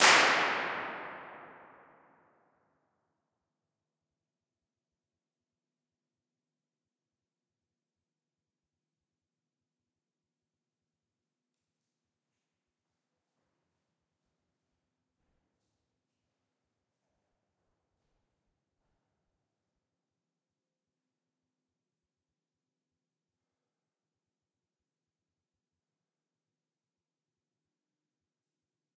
Spinnerij TDG tower low cm2-02
Tower IR low. Recorded with LineAudio CM2s ORTF Setup.
convolution, impulse-response, IR, reverb